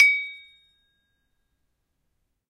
Sample pack of an Indonesian toy gamelan metallophone recorded with Zoom H1.
gamelan, hit, metal, metallic, metallophone, percussion, percussive